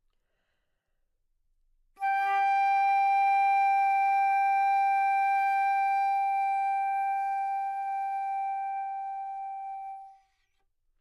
Flute - G5 - other
Part of the Good-sounds dataset of monophonic instrumental sounds.
instrument::flute
note::G
octave::5
midi note::67
good-sounds-id::477
dynamic_level::>
Recorded for experimental purposes
single-note, good-sounds, multisample, G5, neumann-U87, flute